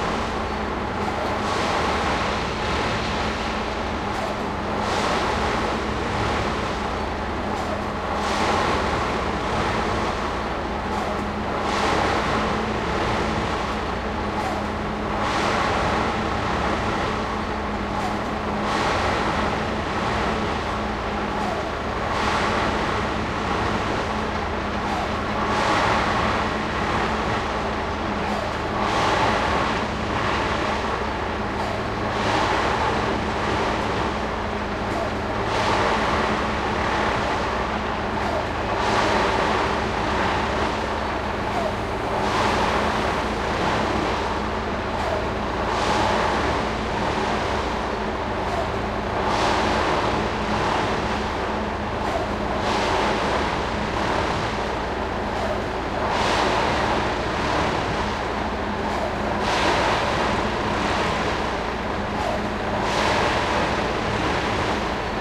RingbahnWirdRepariert Sound2
field recording construction side train track bed industrial agressive massive hard